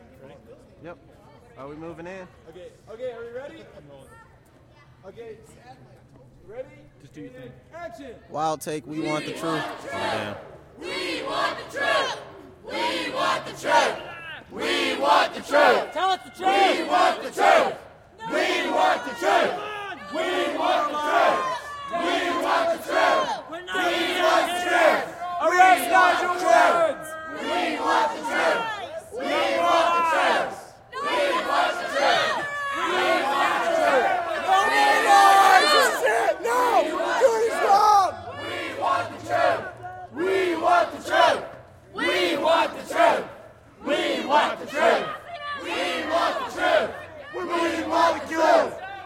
Riot Crowd Immersed in 5.1 Take 3
5, Holophone, Riot, 1, Crowd, Protest